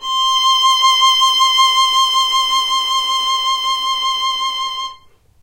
violin arco vib C5
violin arco vibrato
arco, vibrato, violin